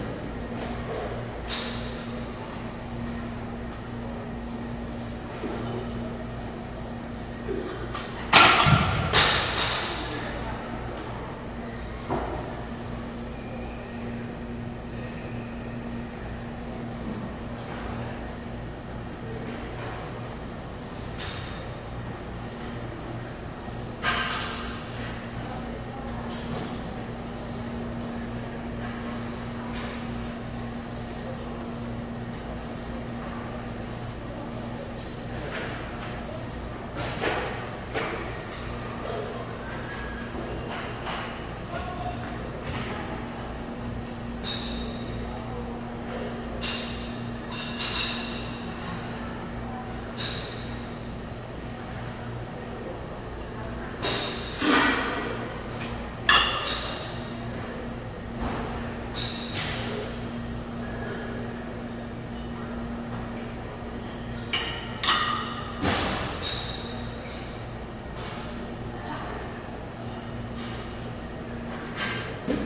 Gym sounds, sound of getting swole. Recorded with a Sony IC Recorder and processed in FL Studio's Edison sound editor.